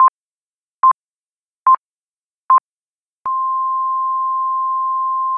PERRICHON Lise 2020 2021 Electrocardiogram
I realised a synthetic sound of an electrocardiogram.I started a new mono track.I choosed to generate a rythm track and more precisely a long ping with a tempo of 72 pulsations and one pulsation per minute. I changed the number of mesure and put 16. I also changed the high of the strong pulsation and put 84 and 80 for the weak pulsation. I changed the bass and treble. I choosed -26dB for Bass and -21dB for Treble and 4,0dB for the volume of the output. I put 4 pings only at the beginning of my track. I rearranged the amplify to -6,6dB. Then I made the second part of the track. I generated a sine tone. I put the pitch at 1050Hz and reduced the amplify to -2,6dB. I put this sound just after the 4 pings.
Beat, Heart